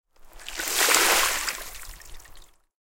Water, Pouring, A
Raw audio of a tub of icy water being poured onto snow-laden concrete. I had carved out a giant ice chunk from the tub and needed to be rid of the watery remains within. The recorder was about half a meter from the splash.
An example of how you might credit is by putting this in the description/credits:
The sound was recorded using a "Zoom H6 (XY) recorder" on 1st March 2018.
Bucket, Pour, Pouring, Splash, Tub, Water, Wet